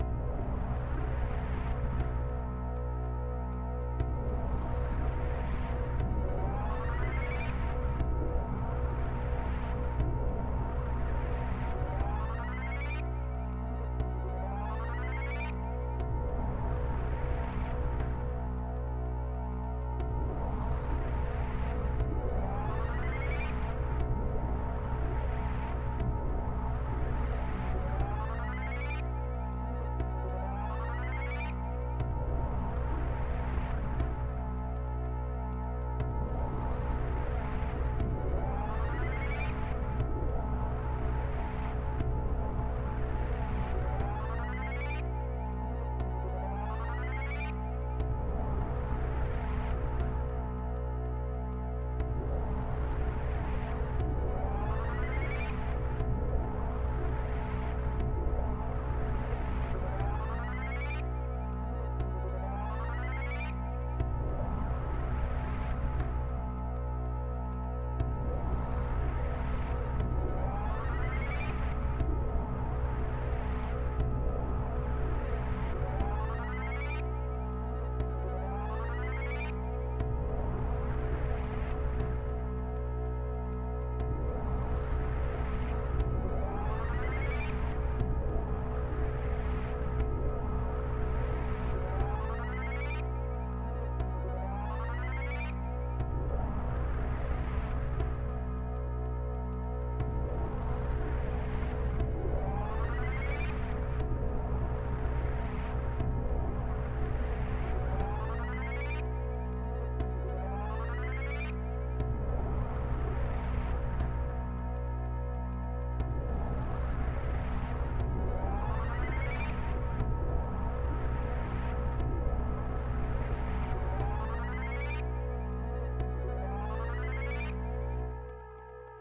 Space Ambience made in Reaper for a class assignment.
ambient, drone, sc-fi, space